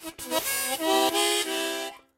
Chromatic Harmonica 28
A chromatic harmonica recorded in mono with my AKG C214 on my stairs.
chromatic, harmonica